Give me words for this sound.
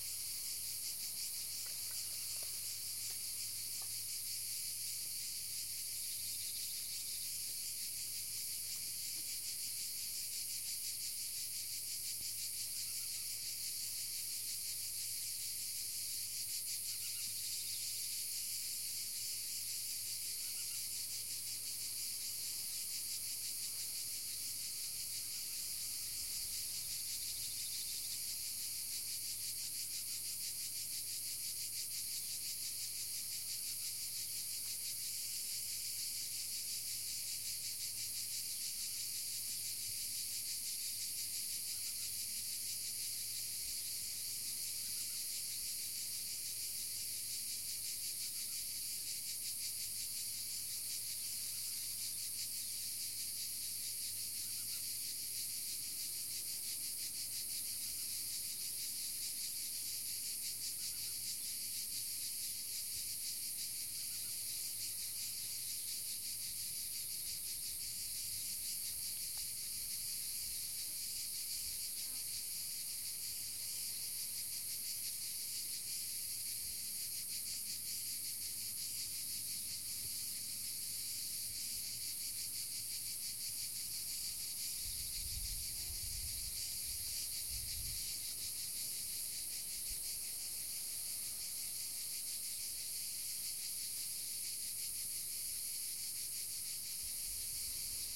Tuscan countryside cicadas 03
ambience, cicadas, countryside, tuscan